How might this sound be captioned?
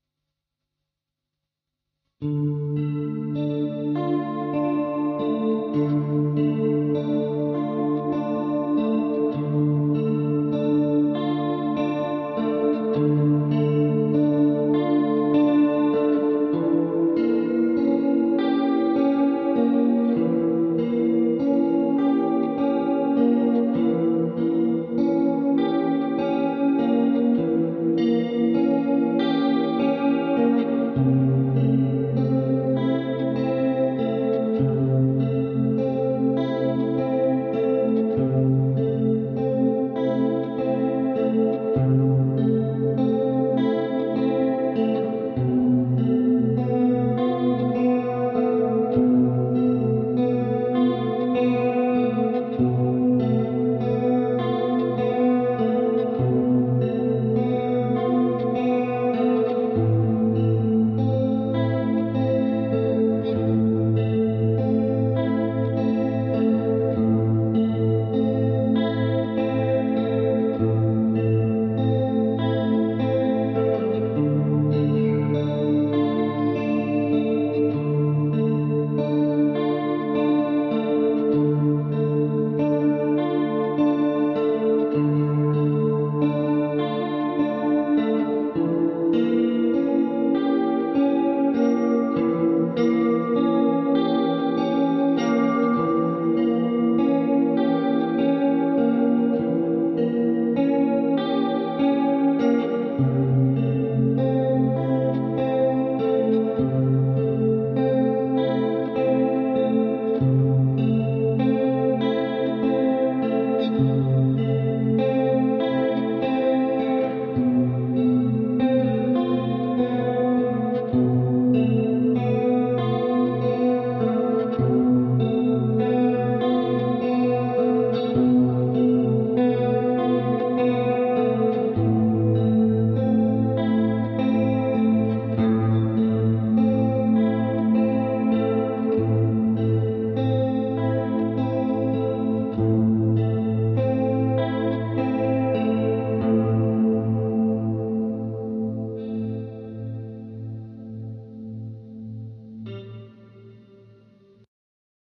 Electric Guitar Etude in Dm
Etude of Electric Guitar in Dm. Used chorus and reverberation effects. Size 6/4. Tempo 100. Gloomy and sentimental.
guitar, Electric, Etude, Experemental, Chorus